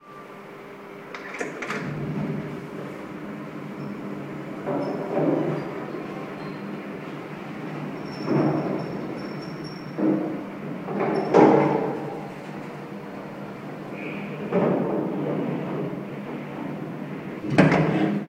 waiting for an elevator to climb up
building, elevator, lift, mechanical, moving, up